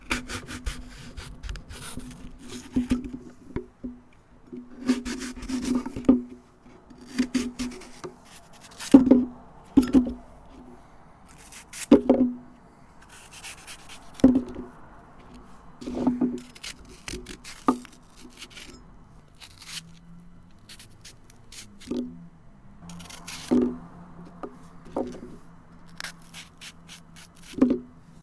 Apples slicing
Foley used as sound effects for my audio drama, The Saga of the European King. Enjoy and credit to Tom McNally.
This is a succession of sounds of me cutting up a raw apple with an almost sharp knife above a chopping board. There's an interesting wrenching / tearing sound of the cellulose fibres separating and a jaunty bomp as they fall onto the board. The sounds can be useful for SFX of breakages, scrapes and organic monster sounds. Would probably sound interesting when slowed down. The track needs some cleaning up as some traffic sounds are audible in the background.